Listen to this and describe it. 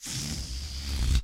These were made for a special kit i needed for school. They are the inspired by "in just" by e.e. cummings.
balloon, bass, deflate, loud